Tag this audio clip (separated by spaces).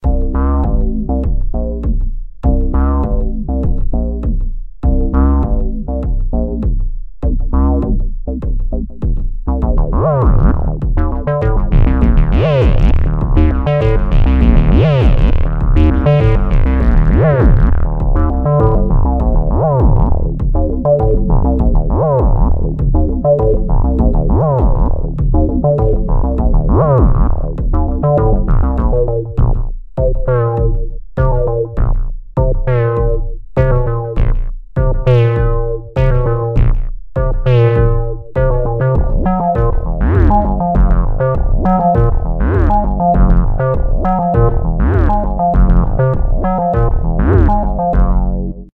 90-bpm techno